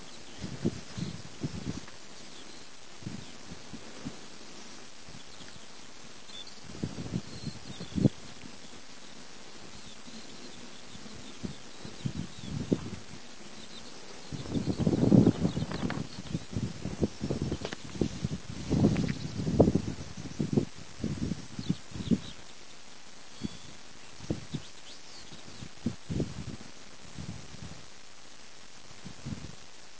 The sound of a skylark singing in the Peak District, England.
It's a bad recording because it's from a digital camera and it was a little windy at the time, but you can still hear it. Use headphones!
bird, birds, bird-song, birdsong, field-recording, lark, moor, skylark